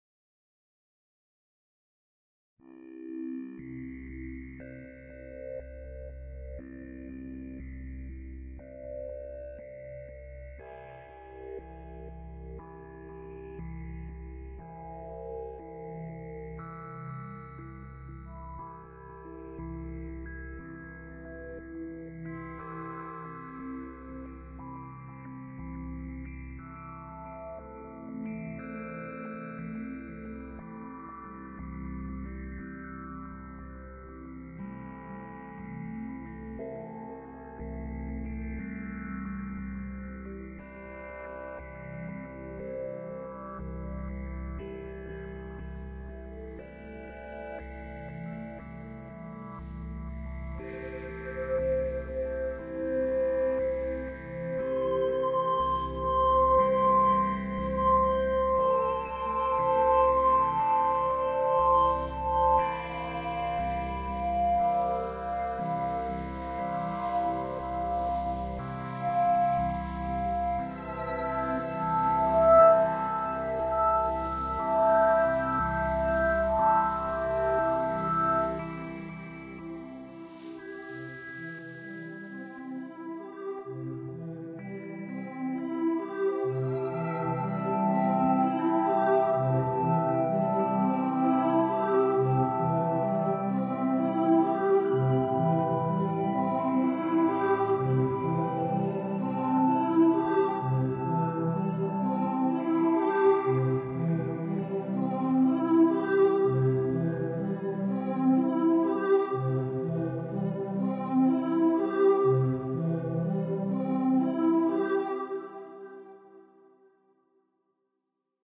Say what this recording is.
Short tune I made while messing around with music for podcasting. All made in ProTools.
Transition, Ambiance, Ambient, mood, atmosphere, Sound-Design, Loop, Podcast